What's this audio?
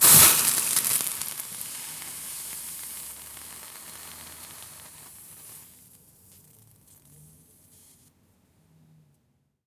Cold water splash on hot plate 2
Dropping a single splash of cold water on a hot plate, creating steam and a nice impact sound with a interesting tail. Close mic.
Also usable as throwing a small bucket of water on a bonfire. The preview is not 100% accurate, the actual file you download is much more crisp.
bonfire, close, cold, drop, hot, plate, splash, steam, steamy, water